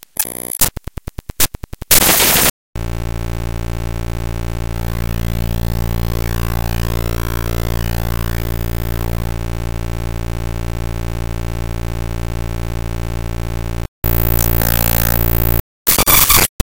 created by importing raw data into sony sound forge and then re-exporting as an audio file.
raw, clicks, glitches